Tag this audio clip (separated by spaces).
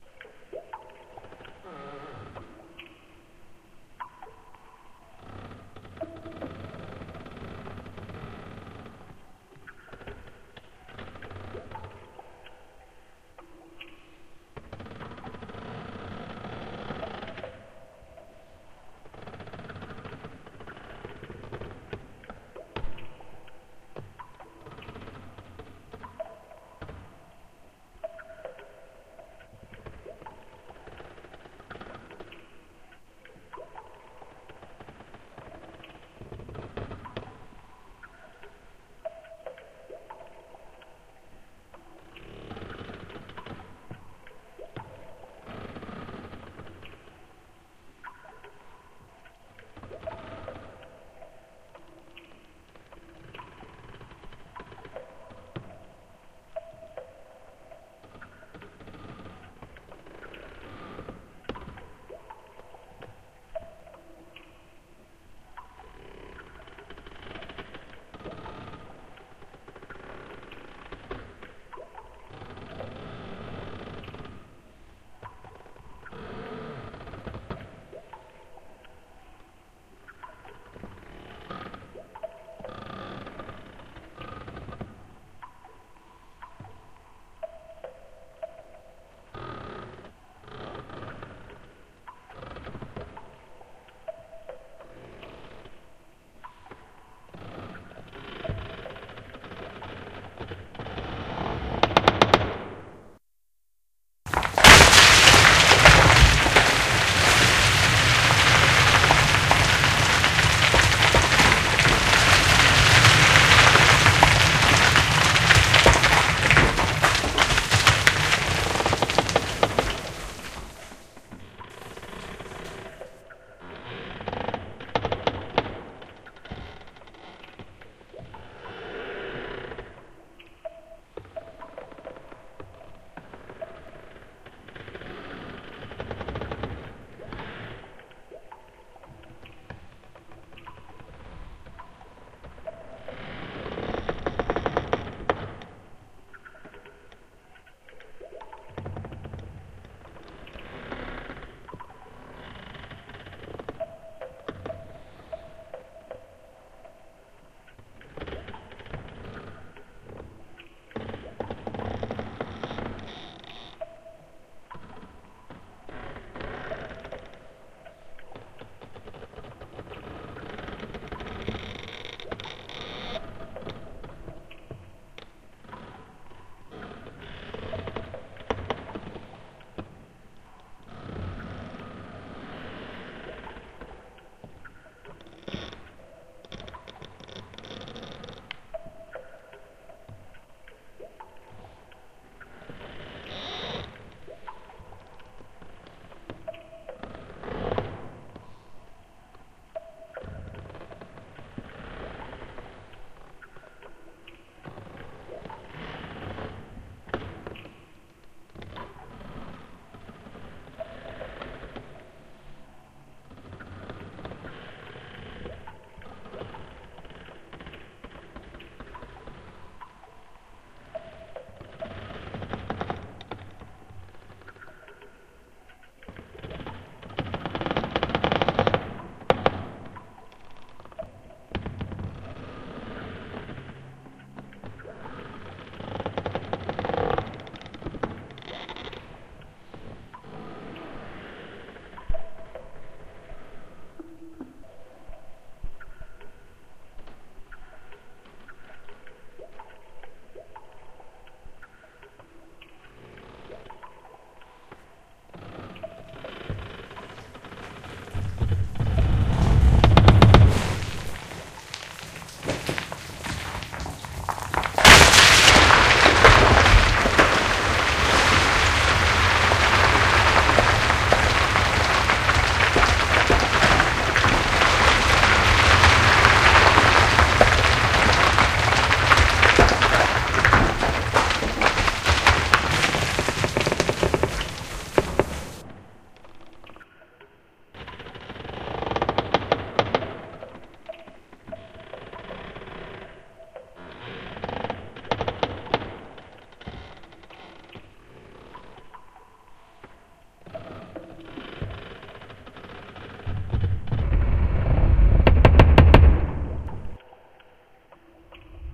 ambience background-sound